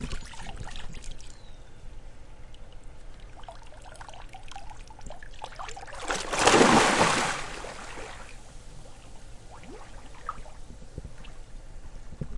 -Splashing and diving in backyard pool